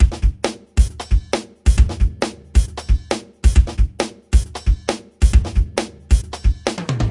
NS= Natural sound. Reverb from Ambiance by Magnus of Smartelectronix